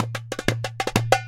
188-darbuka-doumbek groove fill 188 bpm
188 bpm 4/4 darbuka/doumbek loop/fill recorded in stereo at my home studio with a Behringer B1 and a Shure SM57. Have fun with it.
188-bpm, acoustic, beat, darbuka, doumbek, drum, drum-loop, drums, fill, groove, hand-percussion, loop, percussion, percussion-loop, rhythm